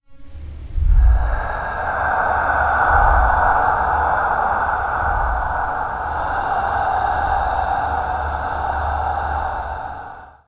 A ghostly inhale and exhale I made myself and edited in Audacity.
halloween, inhale